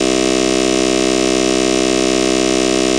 Amen snare fill